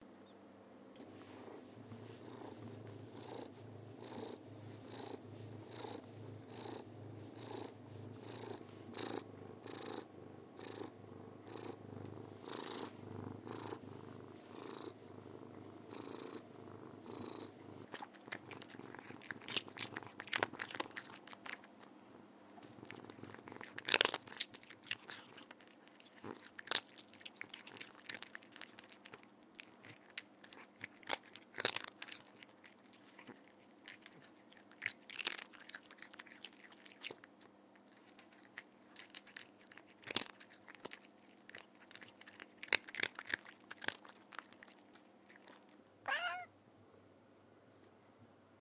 Internal, kitchen. Close-up sound of cat purring, purring while eating crunchy treats, and then a small 'more' meow at the end.
Recorded on a Zoom recorder.
ADPP, cat, crunching, domestic, eating, purr, purring